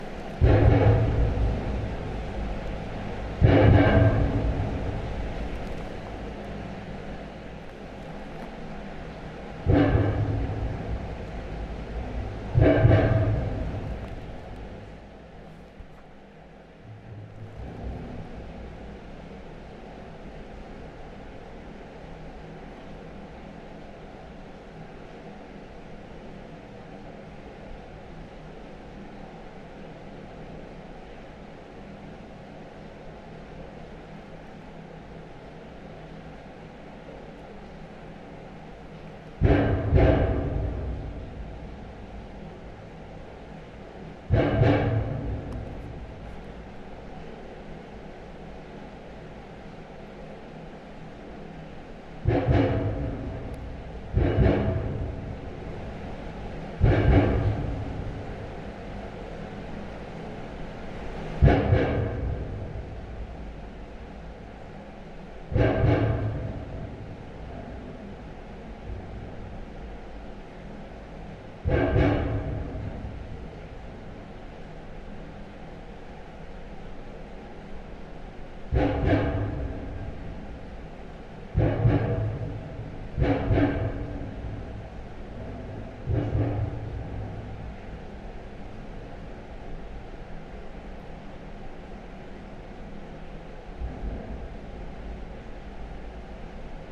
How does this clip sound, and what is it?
sewer loud thumps cars passing over tippy manhole cover recorded from another manhole1 mono
tippy, cover, sewer, manhole, loud, cars, over, passing